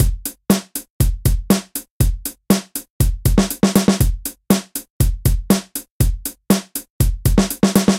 just a short drum loop :)